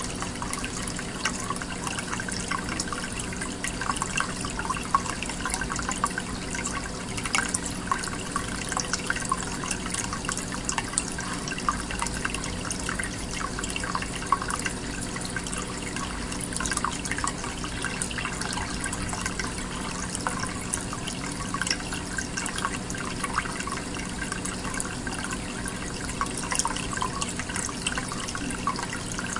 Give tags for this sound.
turtle; sony-ic-recorder; Tank; looping; water

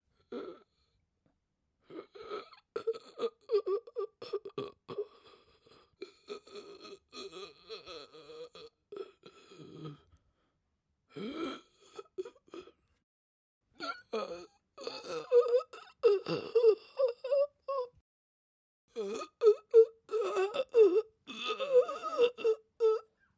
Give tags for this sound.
sounddesign; suffocating; man; hard; breating; old

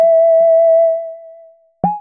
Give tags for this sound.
basic-waveform; experimental; multisample; reaktor; triangle

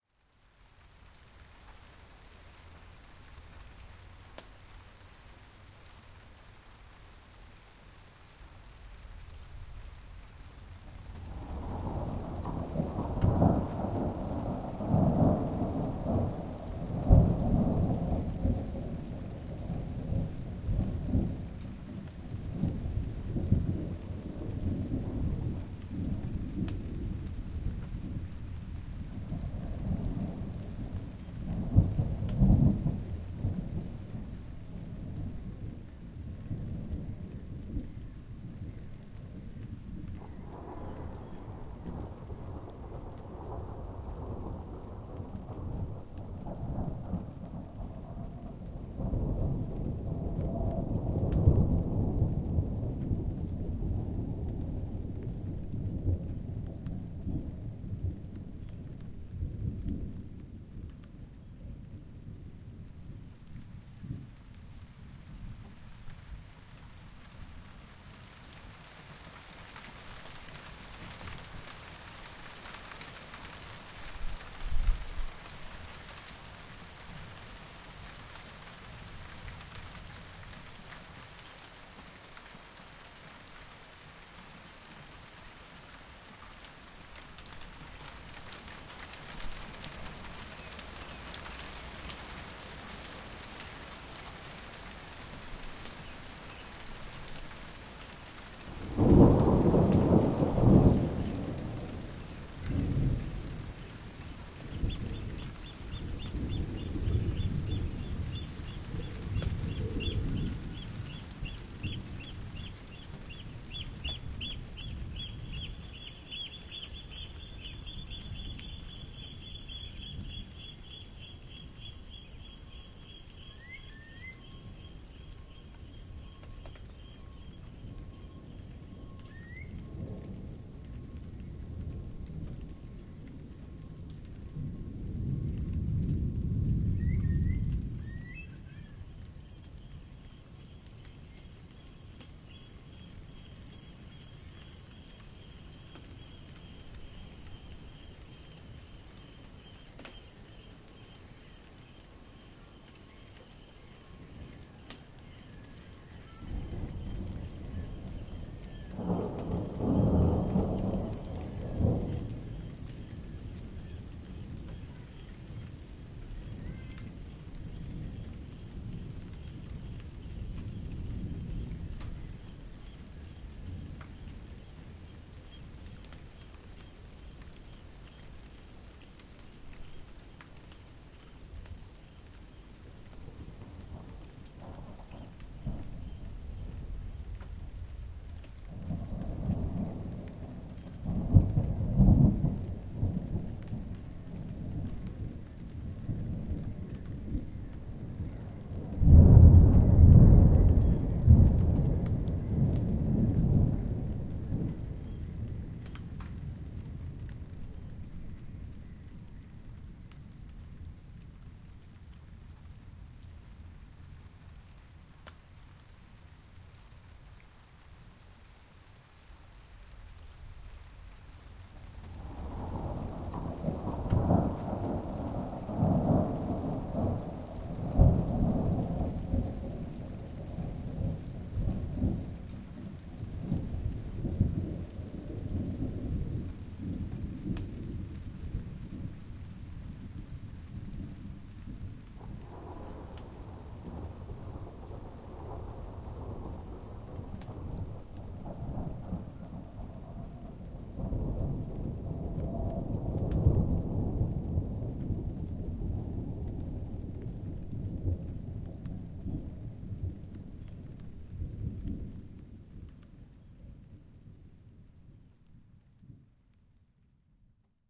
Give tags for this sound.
calm; essex; estuary-storm; field-recording; flash; lightning; msh-6; nature; neighbourhood; rain; raining; rolling-thunder; rumble; scared-birds; shower; storm; strike; summer; thunder; thunder-storm; thunderstorm; thunderstorm-uk; uk; wading-birds; weather; zoom-h6; zoomh6; zoomh6msh6

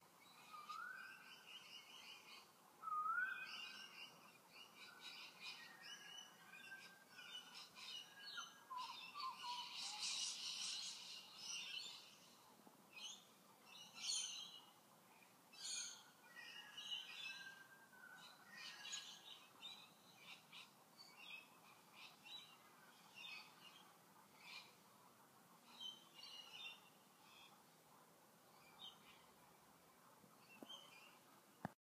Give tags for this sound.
Tweet; Bird; Chirp

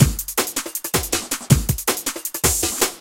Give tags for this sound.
break; drumnbass; old

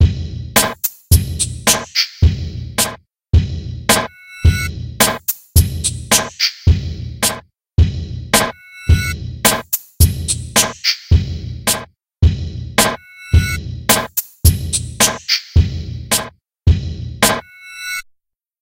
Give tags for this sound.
beat; slice; bpm; hop; mpc; kit; hip; snickerdoodle; snare; 108; bass; dub

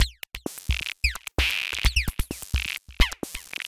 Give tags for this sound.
beats; drumloops; effects; glitch; idm; processed

